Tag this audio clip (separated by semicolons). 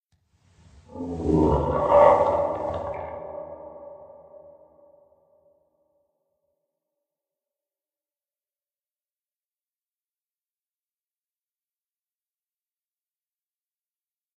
beasts; creature; creatures; growl; growls; monster; noise; noises; processed; scary